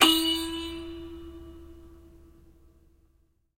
catapult for tiny things
recordings of a grand piano, undergoing abuse with dry ice on the strings
scratch, torture, dry